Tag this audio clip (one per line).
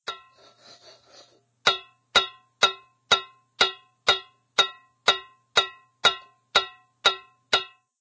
Audio
Clase
Tarea